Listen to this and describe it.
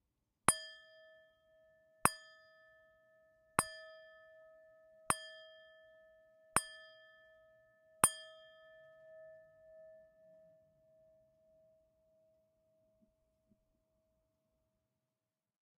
clock chime
six chimes on a small brass prayer bowl
chiming, chime, bell, grandfather